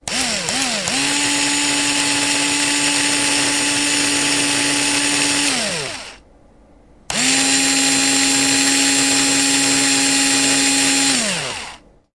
A small drill motor, when just a little charged.
Recorded with a Zoom H2. Edited with Audacity.
Plaintext:
HTML: